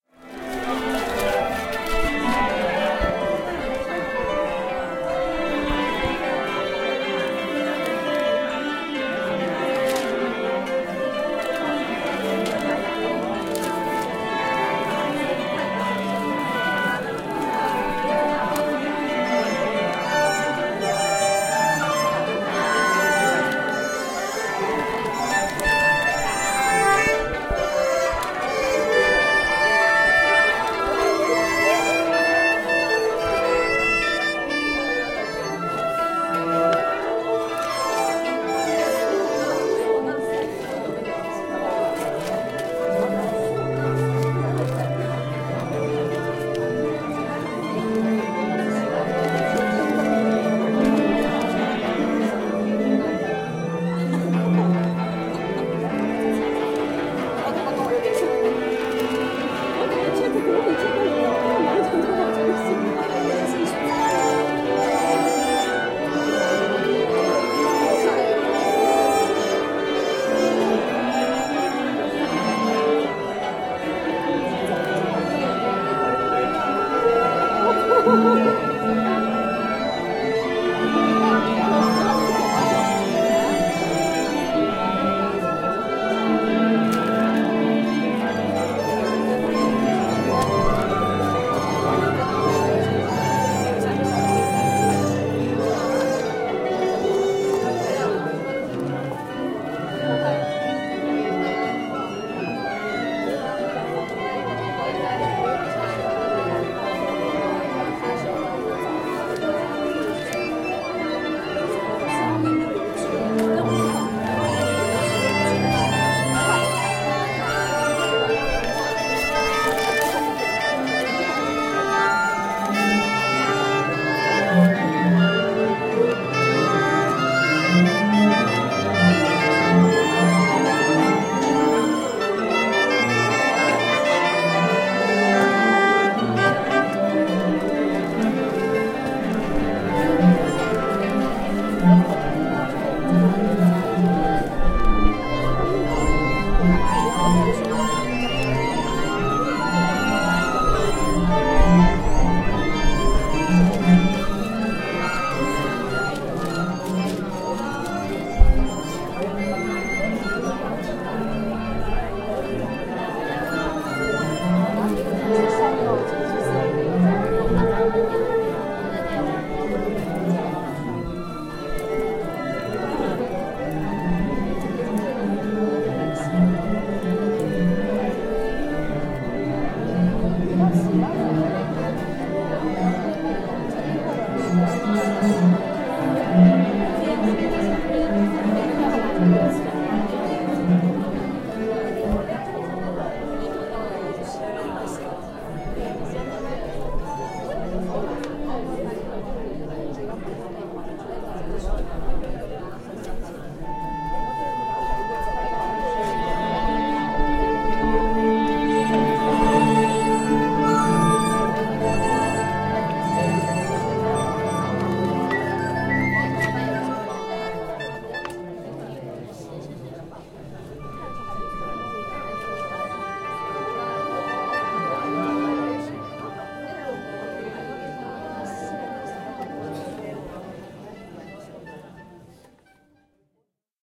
crowd arquestra warmingUp 2
minutes before starting concert by Macau Chinesse Orchestra